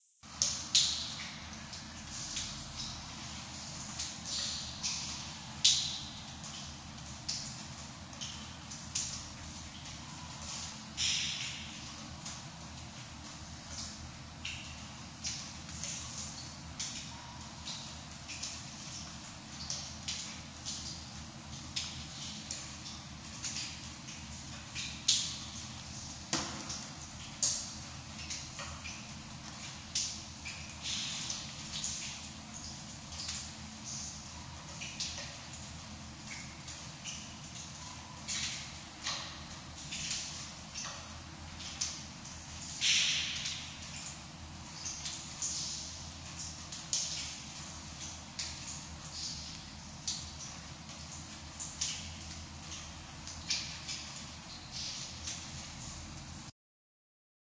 Water drips in stairwell
Dripping sound in a concrete stairwell of cark park during rainy weather.
( :D That would be cool)